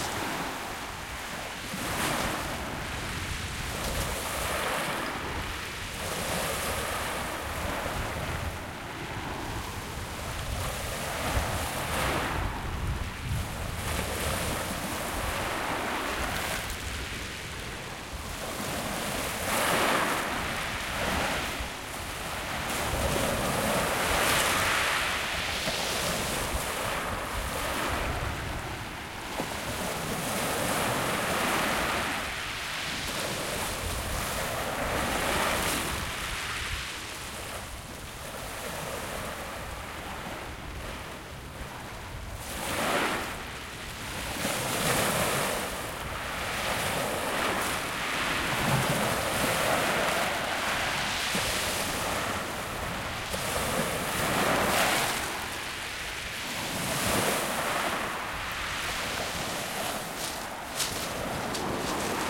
Waves Real Shingle
A breezy Day on a empty shingle beach (Winchelsea) in Autumn.